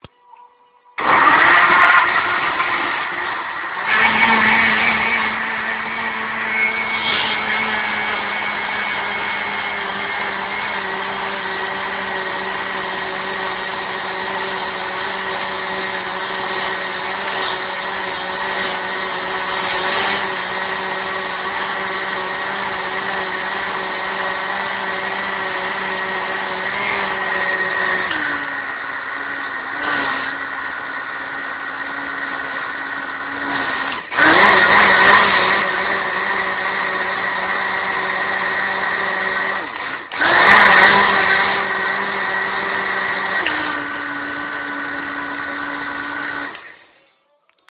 The blender's noise while I prepare my breakfast.
Kitchen, Blender, Harsh